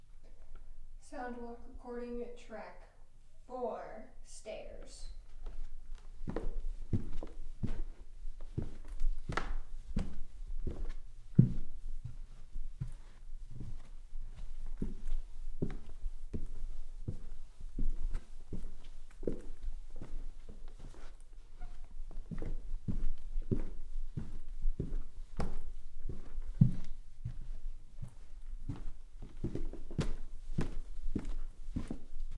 Sound Walk - Stairs
Walking up and down carpeted stairs
staircase, stairs, stairway, walk